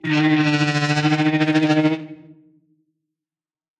chair dragon sing
Processed recordings of dragon a chair across a wooden floor.